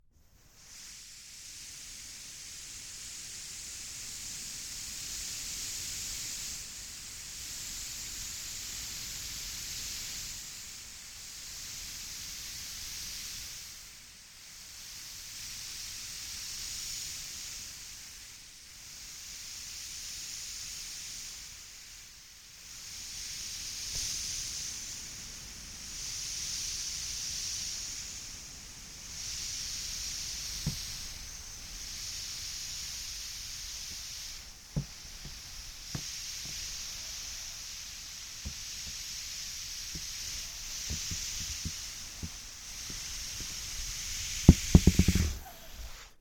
Balloon Deflate Long 1
Recorded as part of a collection of sounds created by manipulating a balloon.